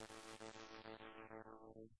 Sci-Fi Impact
Made in Adobe Audition
Can be used on Sci-Fi games/videos